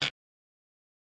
50- ruidos bicho 3
creature, monster, toon